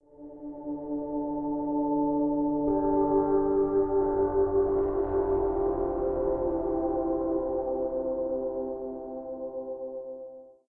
Some good long cinematic pads. Chorus, reverb, blur, multiband compression, a tiny bit of flange, and some bass boost to finish it off.